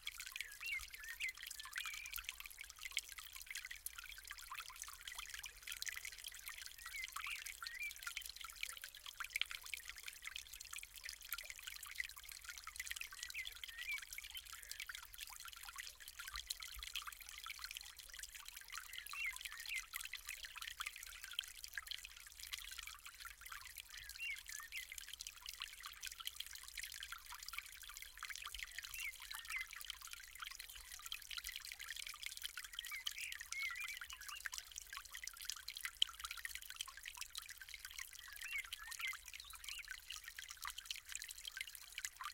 Waterflow Ib
Sound of water streaming down in storm water channel.
Stream, Nature, Coast, Field-Recording, Splash, River, Flow, Bubbles, Bubble, Water, channel